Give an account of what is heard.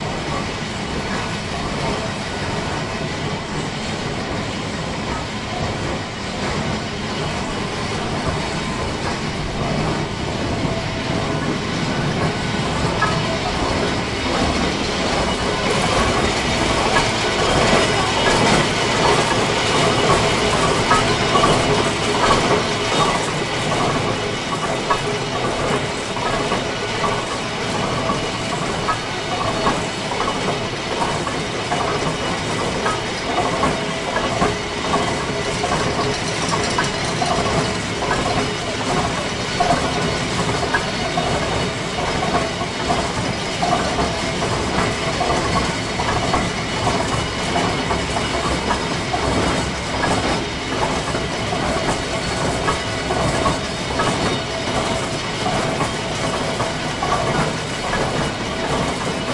rope-making machinery running
The sound of the moving carriage as it passes us on its tracks, twisting the hemp strands as it goes. A traditional process still carried out using Victorian machinery at the Chatham Historic Dockyard Ropery.
track, machine, historic, trundling, spinning, ropewalk, rope, dockyard, Victorian, traditional